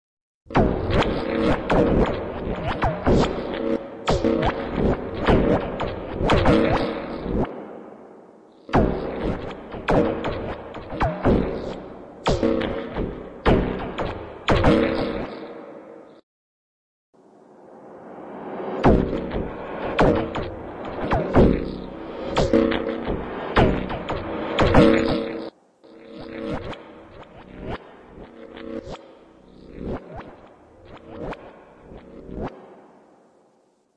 Crazed twang scenarios
I went somewhat overboard with echo and other effects on the previous twang scene and multitwang files
recording, mic, I-made-this, synthesised